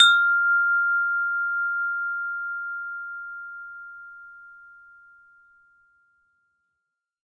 windchime tube sound
sound, tube, windchime